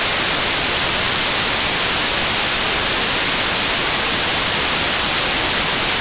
Since my other static file was so popular I have created a perfectly looping continuous static sound in Audacity for those whose TV just can't get a signal!